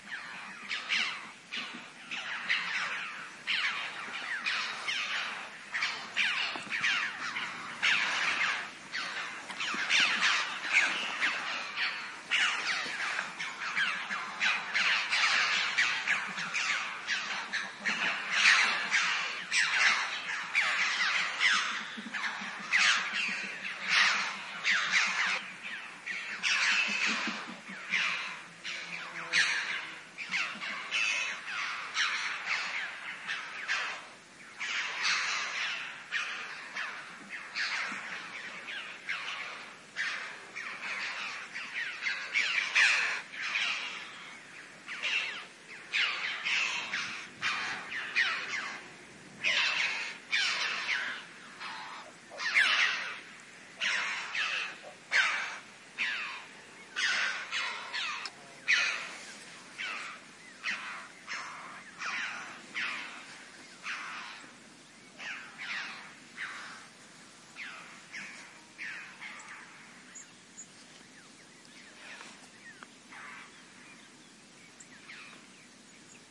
20170217 04.chough.flock
Callings from a group of around 50 Red-billed Choughs in flight. Recorded at the mountains of Sierra de Grazalema (S Spain) with Primo EM172 capsules inside widscreens, FEL Microphone Amplifier BMA2, PCM-M10 recorder.
ambiance, birds, Chough, filed-recording, mountains, nature, Phyrrhocorax-pyrrhocorax, south-spain